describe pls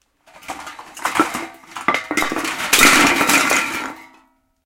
Aluminium Cans Tipped
A stereo recording of aluminium beer cans being tipped on a carpeted floor ready to be crushed by feet. Rode NT4 > FEL battery pre-amp > Zoom H2 line in.
aluminium, beer-cans, cans, crash, falling, metallic, stereo, tinnies, tipped, tubes, xy